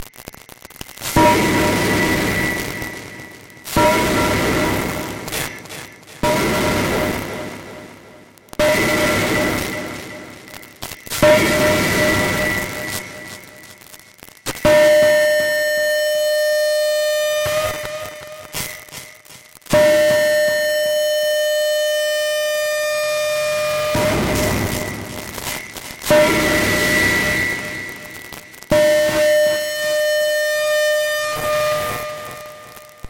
The file name itself is labeled with the preset I used.
Original Clip > Trash 2.